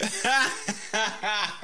147 IDK laugh rythm 01
mikeb vocals laughing
mikeb, vocals